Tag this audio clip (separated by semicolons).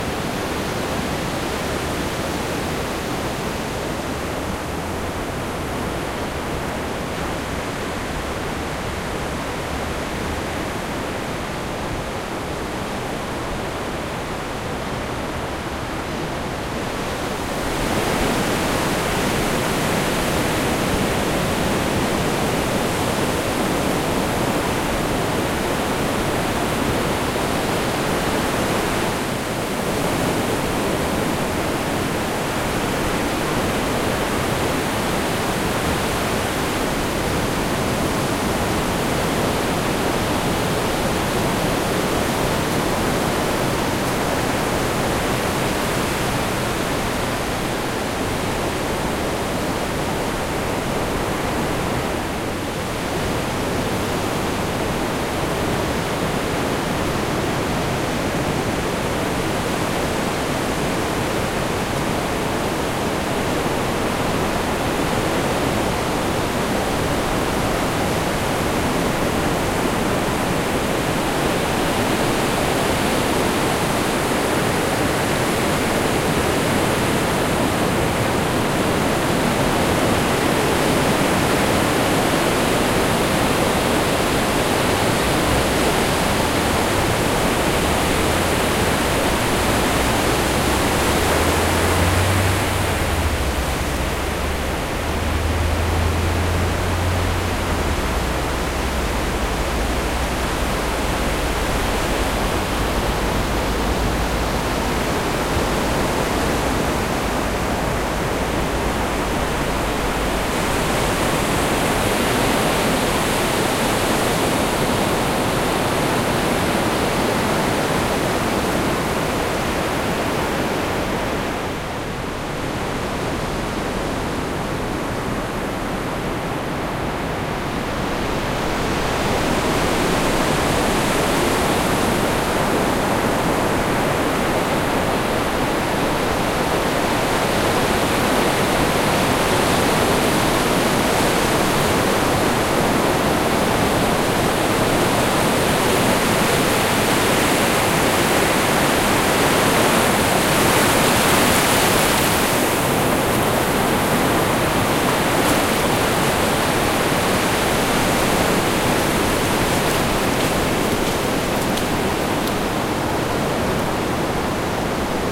ocean; sea; mar